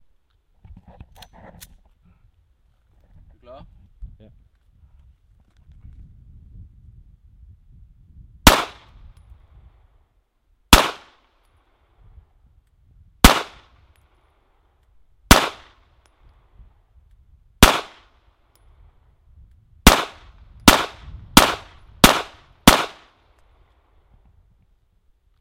Shot of real pistols shooting outside.